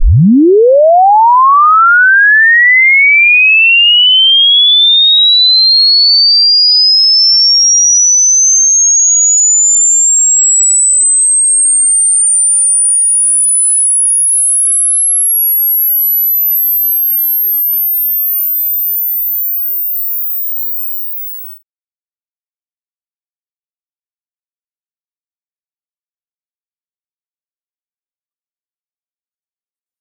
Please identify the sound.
0Hz to 22500kHz in 30 seconds
Sine wave sweep from 0 Hertz to 22.5 kilohertz generated in Audacity.